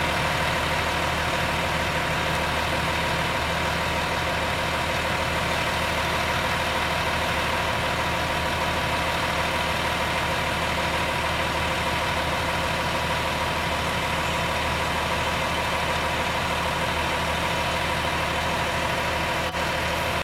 Fire Truck - Idle
The sound of a parked fire truck engine on idle.
rescue, emergency, first-responder, station, fire, truck, sirens, fireman, vehicle